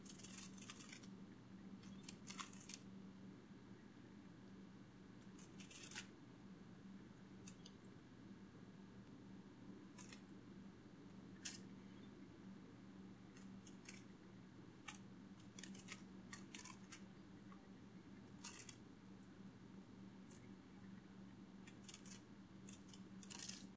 Butterfly Wings Fluttering 2
Audio captured from a video taken with a Nikon Coolpix camera. Enjoy!